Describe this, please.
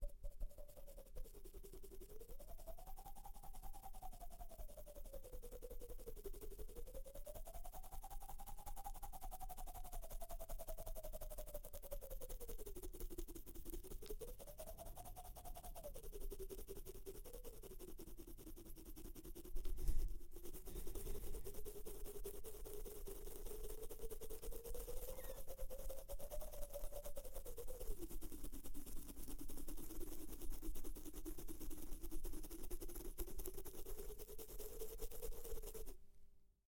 Scratching beard cheek with opened mouth. Studio. Close mic.
beard, cheek, human, scratching